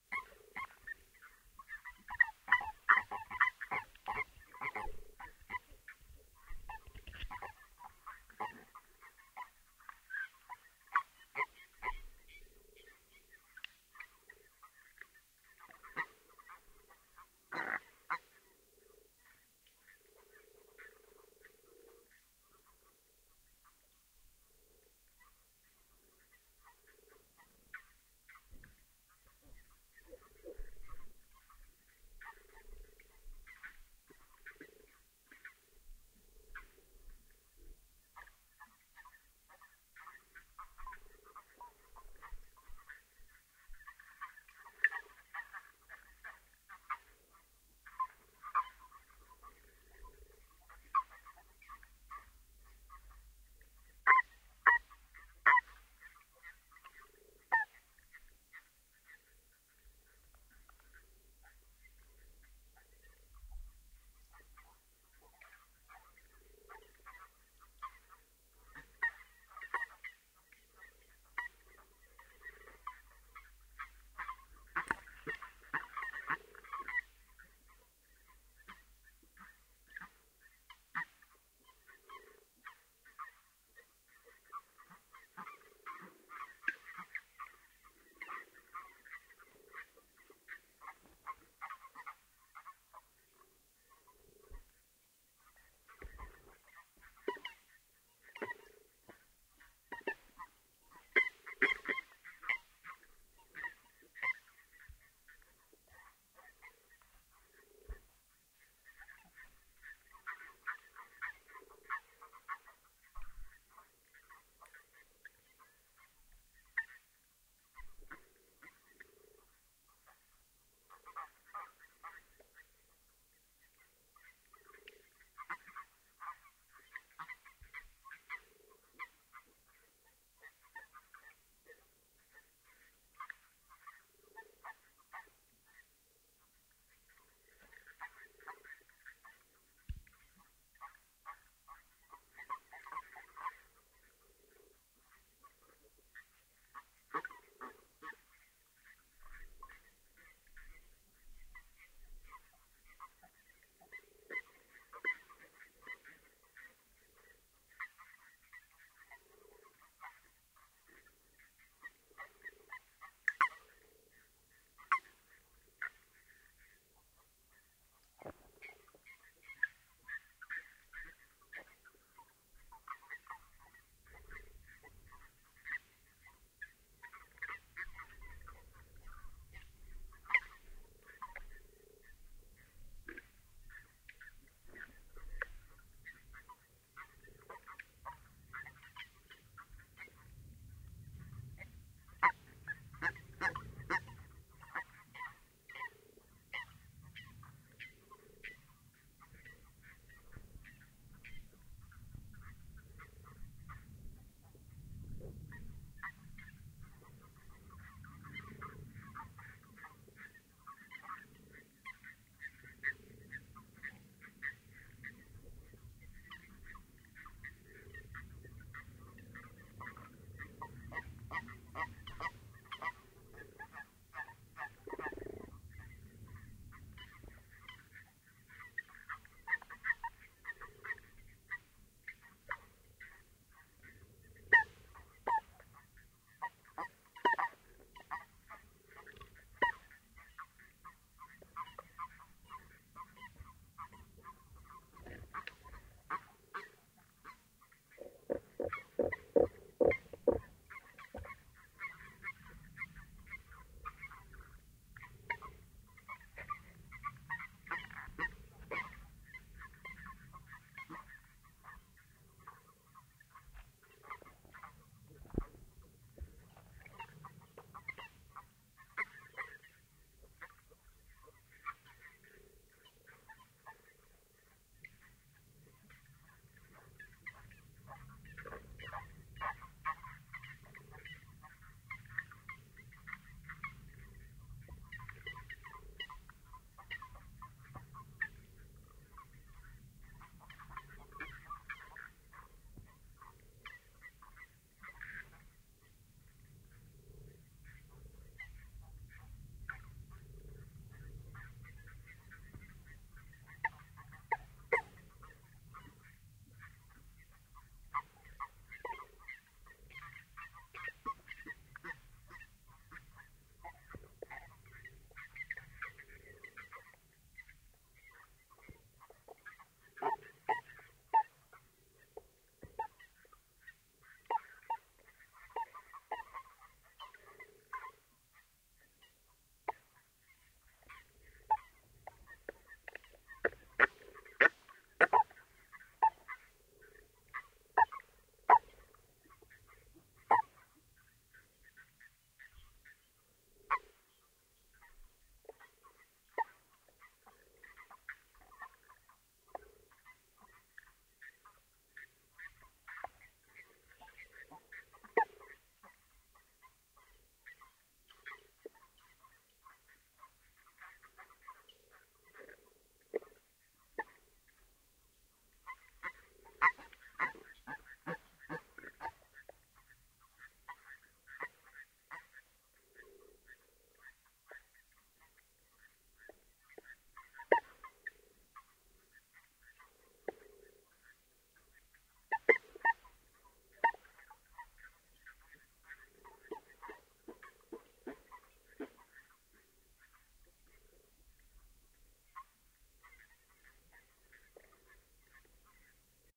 (HYDRO) Frogs in the deep
Mating season in this pond full of frogs. Hydrophones were thrown deep into the pond. Croaking and squeaking. Some gain and cleanup applied in iZotope RX.
Stereo recording made with JrF d-series hydrophones into a Tascam DR-100mkiii thru Hosa MIT-129 Hi-Z adapters.